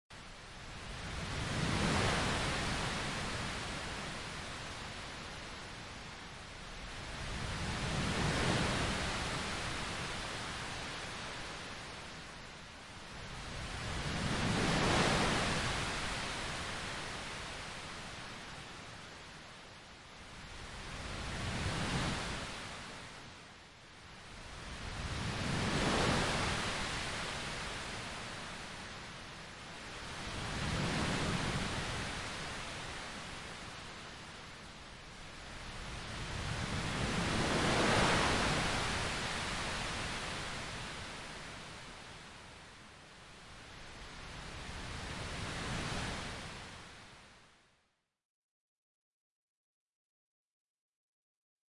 wave sound that i made from white noise
dalga, ocean, seaside